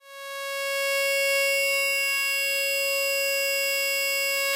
buzzring1-chiptone
8-bit, 8bit, arcade, chip, chippy, chiptone, decimated, game, lo-fi, retro, vgm, video-game, videogame